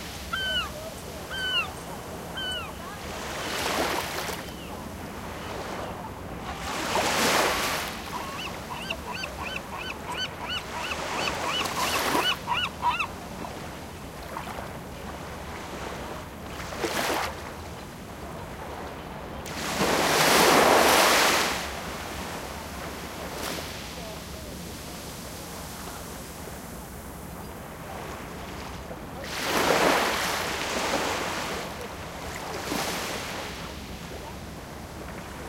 Aquaticparksf2009st
San Francisco Aquatic park 2009 near field recording of waves (small) and birds. In stereo.
ambient, bay, birds, california, field-recording, northern-california, san-francisco, stereo, water, waves